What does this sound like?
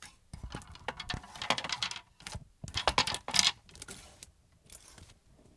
Rummaging through objects